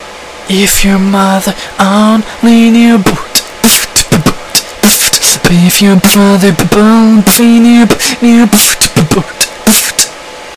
if your mother only knew beatbox
singing and beatboxing at the same time..
mother, if, your, only, knew